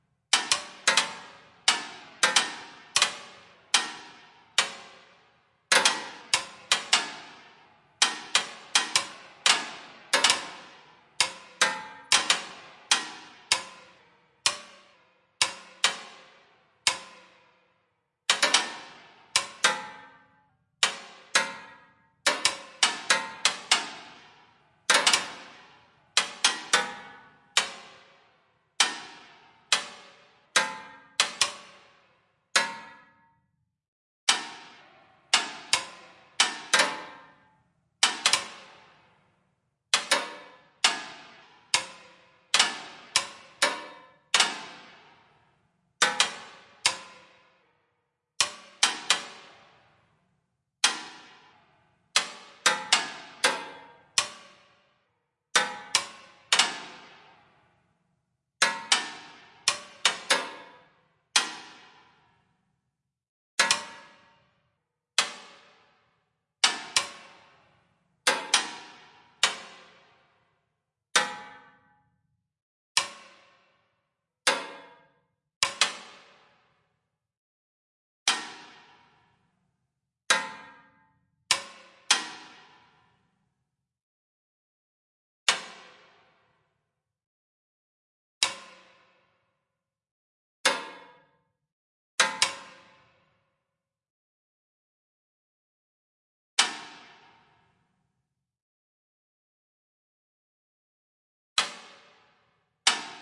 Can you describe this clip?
Clicking Engine Cooldown
I was looking for the sound of a hot engine clicking and found just one with lots of background noises. So I decided to build it from scratch in Bitwig Studio and this is it. The sound starts with fast clicking slowing down. It's not perfect, you can still hear it's made from samples. But as the sound is normally used at low volume, I think I can get away with it. I may upload an updated version later.
car, click, clicking, cooldown, cooling, engine, heat, hot, metal, motor, percussion, race, tick, ticking, truck